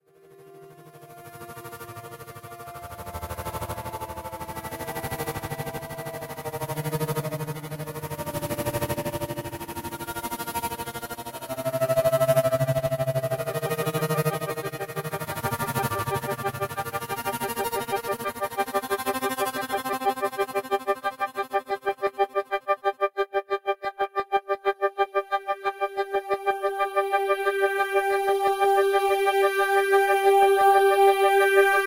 Strange Synth Intro
An other-worldly sounding synth intro.